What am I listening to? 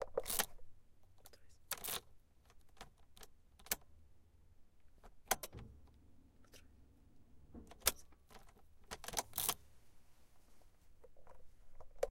Car Keys, Click, Metal
I recorded some car keys entering in the whatever-called thing in a closed car.